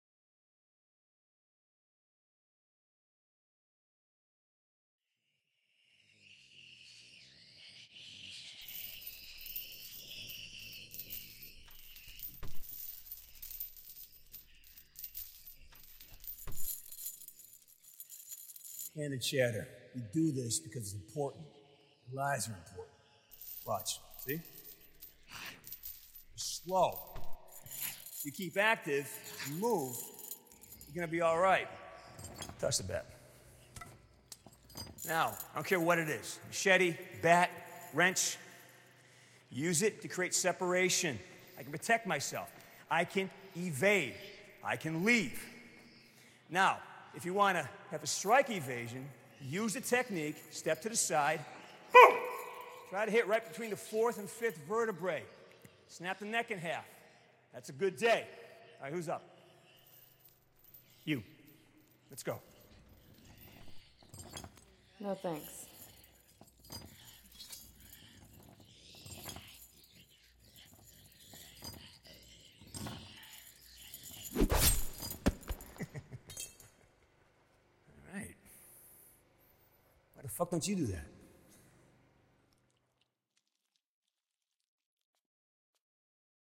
Reverb tail-surround 1
5.1 surround tail from the movie Dead Season, produced by Kurzweil KSP-8.
dead-season, ksp-8, reverb, surround